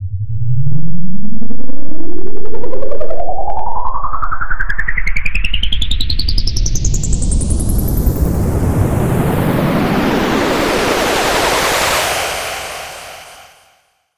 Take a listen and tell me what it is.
Energy Charging
I made this sound in Ableton Live 9. You can make it whatever you like. Right now it sounds like a big energy weapon charging up.
charge, energy, fire, gun, powering, shoot, up, weapon